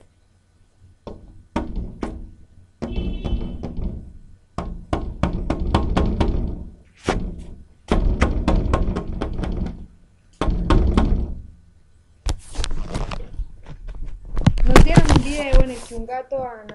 Folley! Some animals Scratch Glass